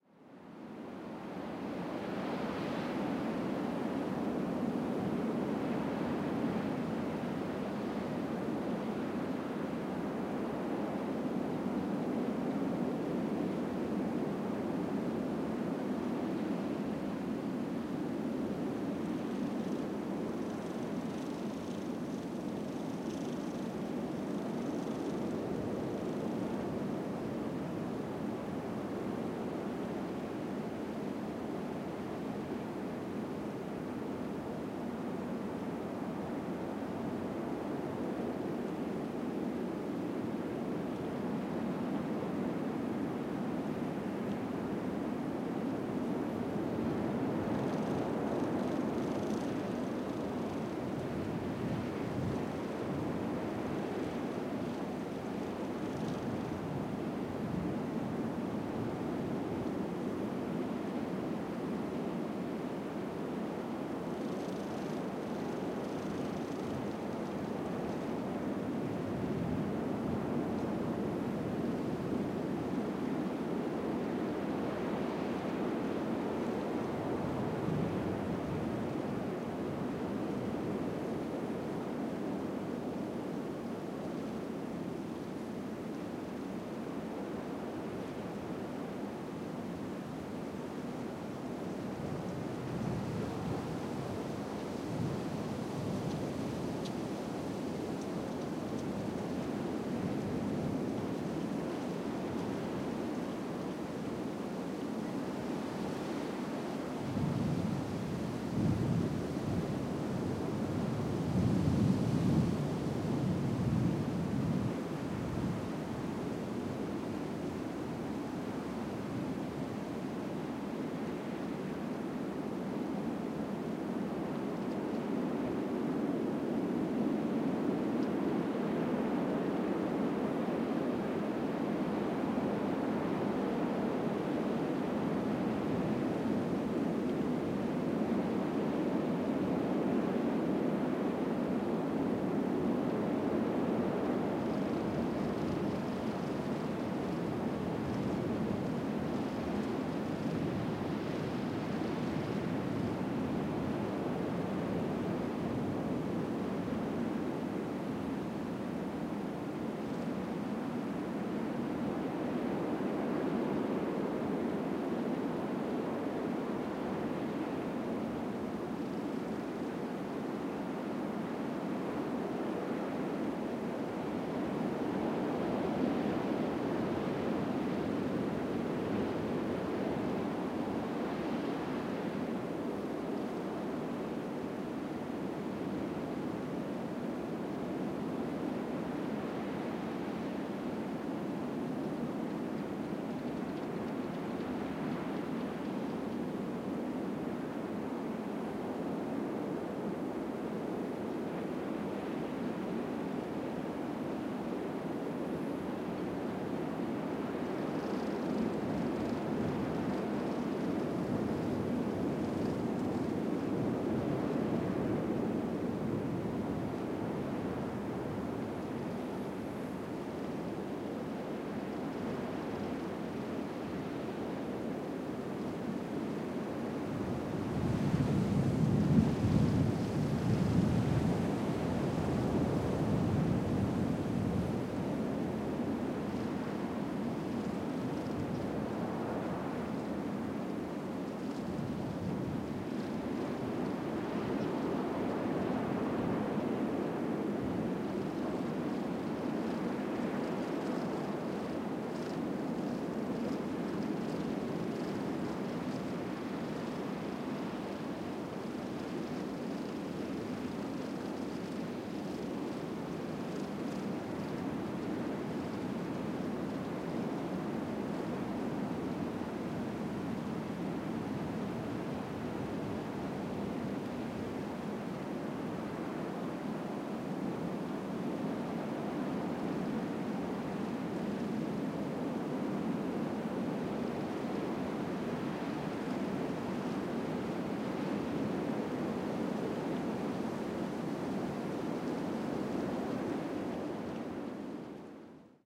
ambience of a mexica navy ship traveling in the ocean at night